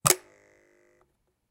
Recorded knifes blades sound.
blade, blades-sound, click, field-recording, glitch, high, knife, percussion, recording, shot, sound, vibration